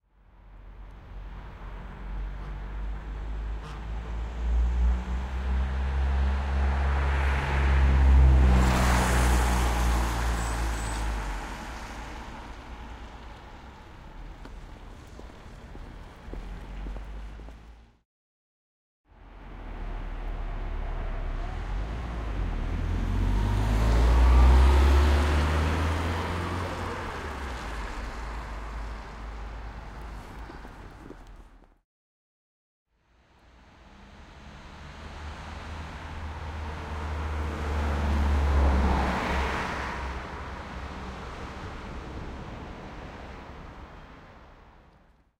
Car Passby's on wet road.
Recorded with Sound Devices 722 and Sennheiser MKH20/30 in MS.
Converted to XY.